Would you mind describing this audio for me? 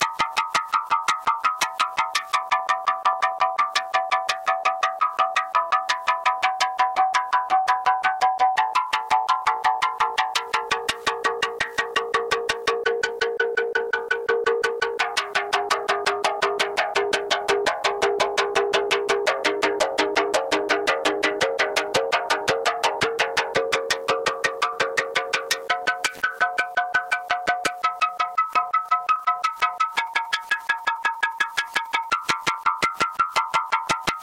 A sound sequence captured from different points of my physical model and different axes. Some post-processing (dynamic compression) may present.
finite-element-method, synthesis, weird